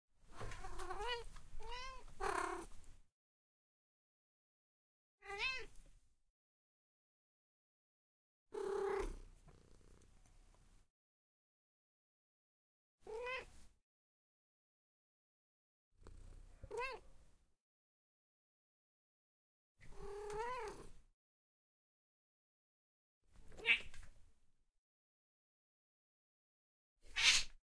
7 Cat, meow
Angry, Cat, CZ, Czech, Meow, Panska